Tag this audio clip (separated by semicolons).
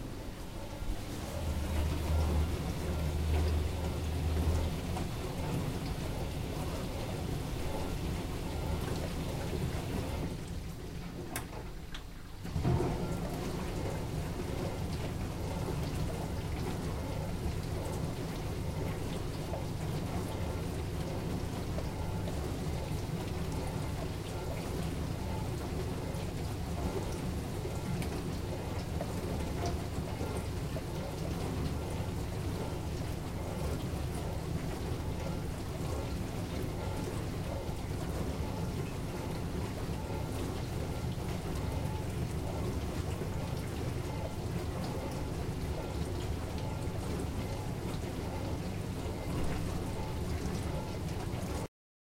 cleaning; dishwasher; machine; wash